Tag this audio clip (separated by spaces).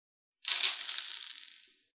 dirt agaxly dust scatter cave litter crumble gravel